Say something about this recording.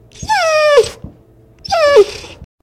Dog Whine 5
whine, dog, whining, animal